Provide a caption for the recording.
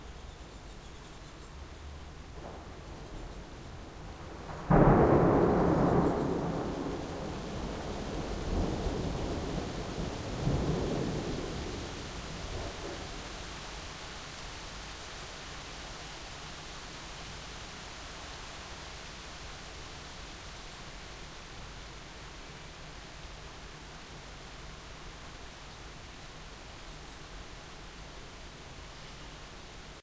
One of the thunderclaps during a thunderstorm that passed Amsterdam in the morning of the 10Th of July 2007. Recorded with an Edirol-cs15 mic. on my balcony plugged into an Edirol R09.
thunder, nature, rain, thunderclap, streetnoise, thunderstorm, field-recording